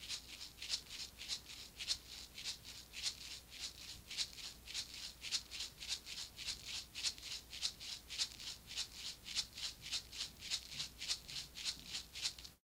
Tape Shaker 9
Lo-fi tape samples at your disposal.
collab-2, vintage, lo-fi, Jordan-Mills, shaker, tape